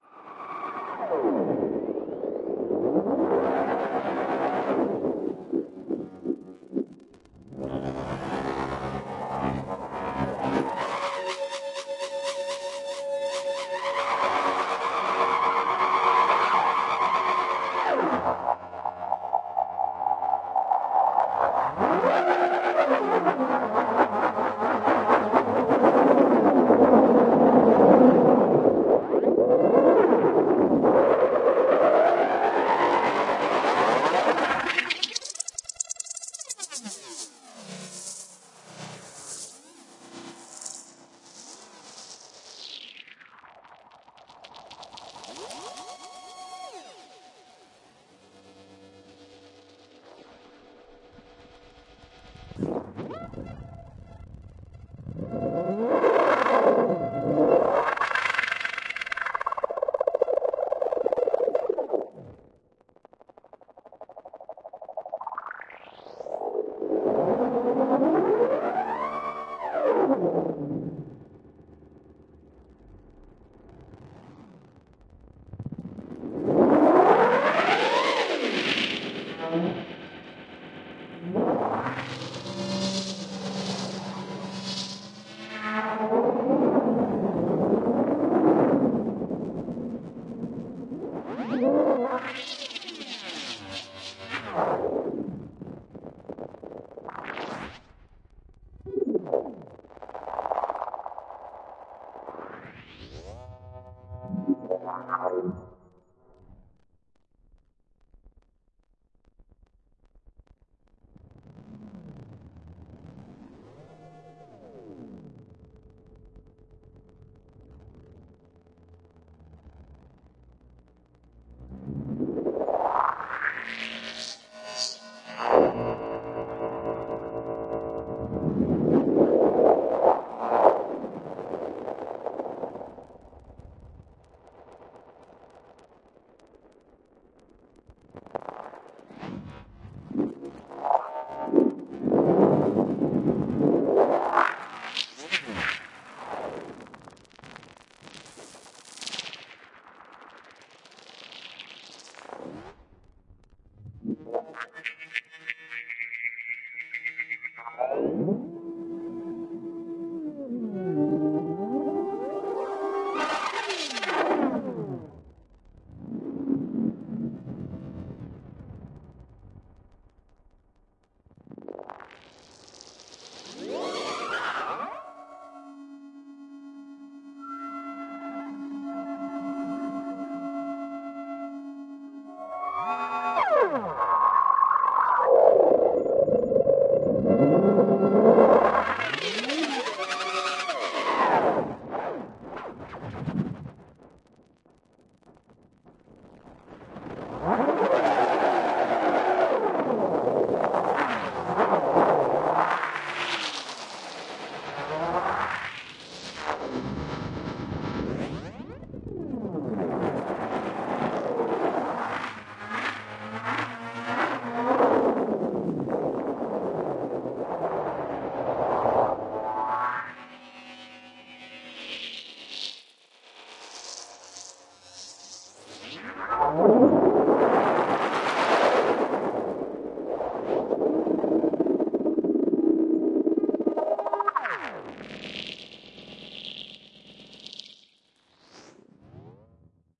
ESERBEZE Granular scape 38
16.This sample is part of the "ESERBEZE Granular scape pack 3" sample pack. 4 minutes of weird granular space ambiance. Noisy space effects.
drone, effect, electronic, granular, reaktor, soundscape, space